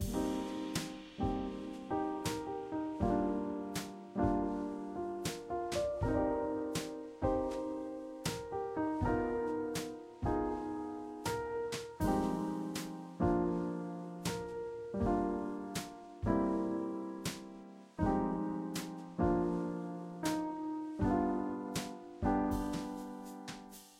Calm Piano Jazz Loop
I haven't uploaded for a while as I've been busy with other projects. But here comes a short jazzy piano loop you can use!
And I want to say a quick thanks to all who have left me comments and PM's about how much you like my loops and the various ways you're using them for school, podcasts, videos, games and apps etc. I appreciate it a lot.
calm, jazz, loop, piano